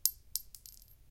Shell .22 Remington 01
A large pack with a nice variety of bullet shells landing on the ground. (Just for you action film people :D)
I would like to note, however, something went wrong acoustically when recording the big .30-06 shells dropping to the ground (I think my recorder was too close when they hit) and so they have some weird tones going on in there. Aside from that, the endings of those files are relatively usable. If anyone can explain to me what went on technically, I would appreciate that as well.
All shells were dropped onto clean concrete in a closed environment, as to maintain the best possible quality level. (I had film work in mind when creating these.)